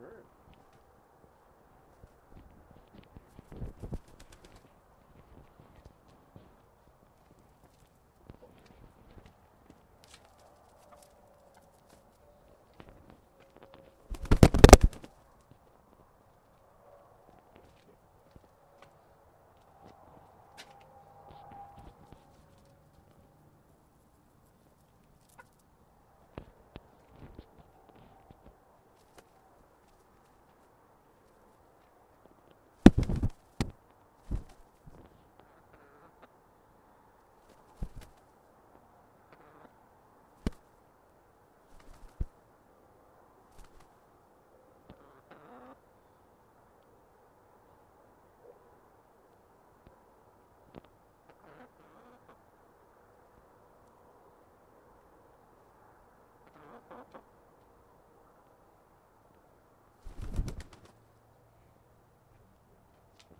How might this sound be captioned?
Chicken feeding in its enclosure